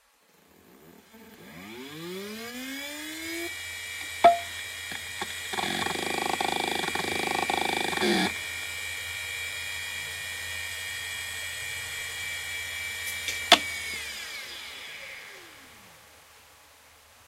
WDAC21200 - 5200rpm - BB
A Western Digital hard drive manufactured in 1996 close up; spin up, seek test, spin down. (wdac21200)